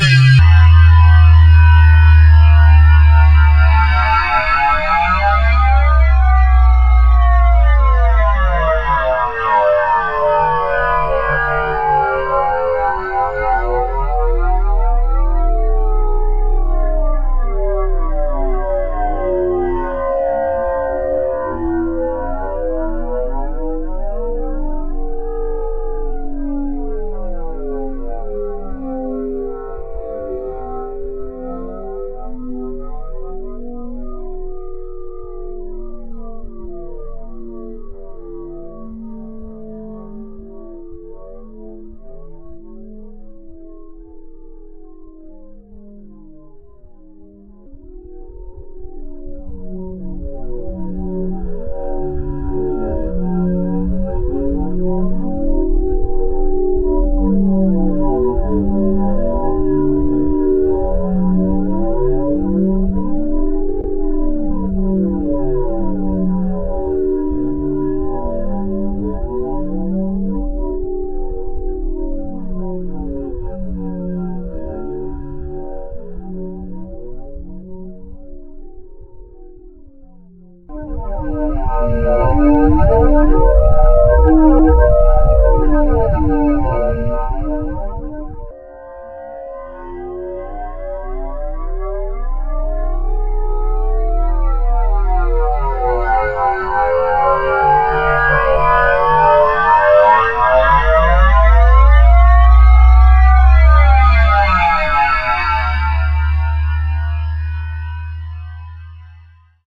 An unidentified space cruiser sweeps down and inspects the runway on planet Dune. The cruiser hovers for a while high above the spice minings then make a last sweep, accelerates and disappears rapidly.
surveillance, Dune, spaceship